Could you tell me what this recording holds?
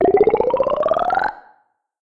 Health Potion [166188 drminky potion-drink-regen]
Edited DrMinky's sound by removing some samples and duplicating the middle one and speeding it up to give it another sequence of "steps" and adding freeverb.
computer, hp, liquid, mp, retro, video